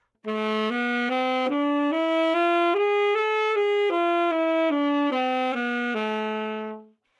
Sax Tenor - A minor
Part of the Good-sounds dataset of monophonic instrumental sounds.
instrument::sax_tenor
note::A
good-sounds-id::6253
mode::harmonic minor